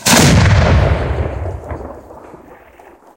explosion big 02
Made with fireworks